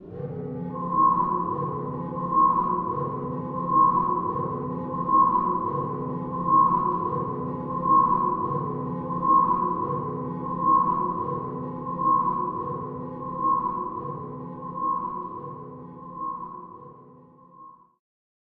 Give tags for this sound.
alarm beeping beware emergency fast future futuristic game high horn spaceship system tech technology tempo video

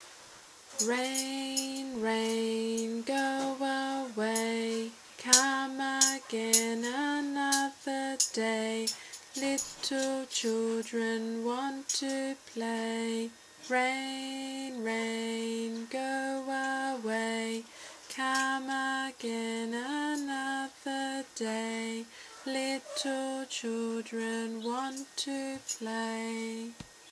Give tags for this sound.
rain ryhme singing

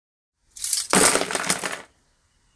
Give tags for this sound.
multiple-objects metal fall impact clatter metallic

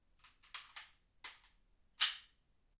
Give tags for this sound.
slide 9mm ambient reload gun fx weapon pistol surround sound

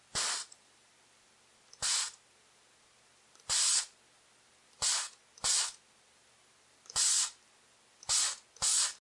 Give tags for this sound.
air spray

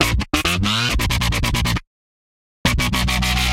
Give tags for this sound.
synth samples loop melody chords digital synthesizer video awesome music sounds game drums drum hit loops sample 8-bit

